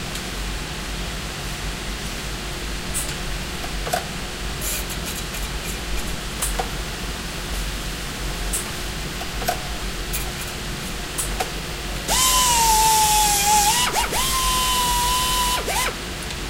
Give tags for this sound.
metal; machine; factory; air-sander; saw-blade

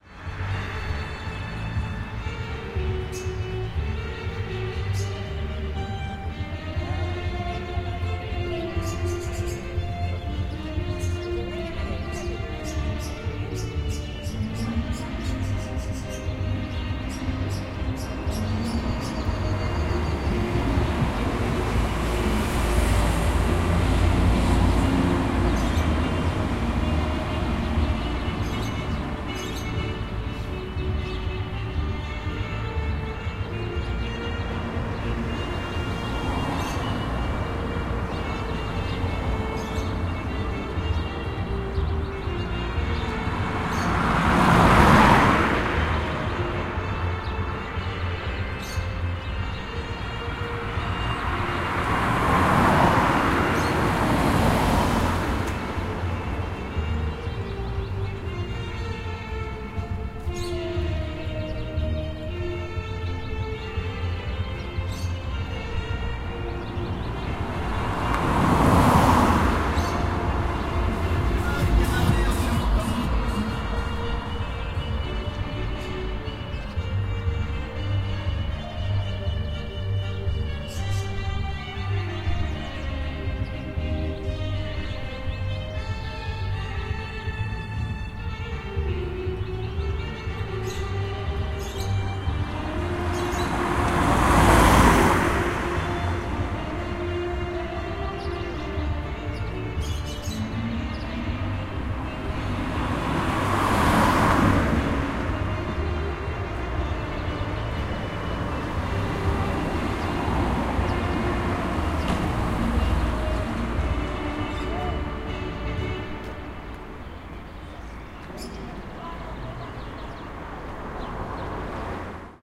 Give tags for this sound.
birds; caceres; field-recording; music; spain; traffic

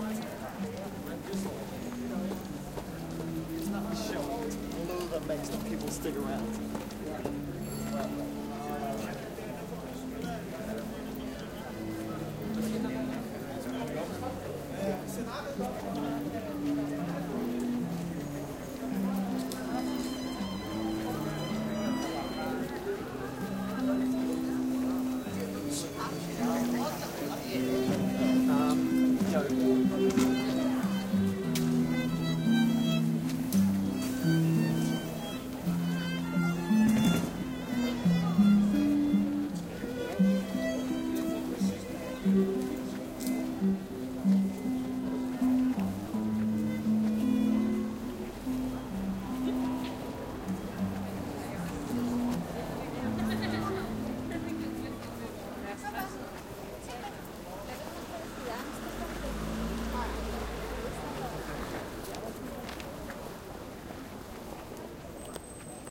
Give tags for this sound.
ambiance; city; field-recording; street; streetnoise; voice

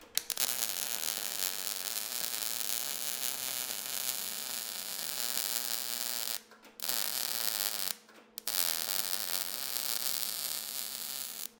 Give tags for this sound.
electric; metal

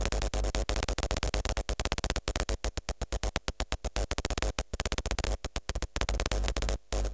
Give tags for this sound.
cable; electric; electronic; machine; signal

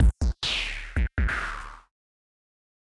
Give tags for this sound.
experimental; percussion

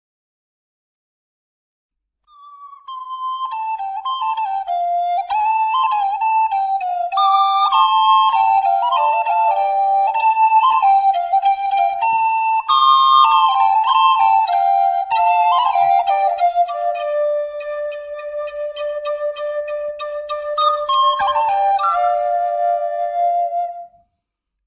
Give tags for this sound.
Dry; Flute; Native-American

